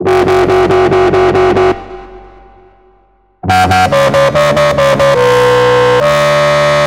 wooble doble1
DB, Dubstep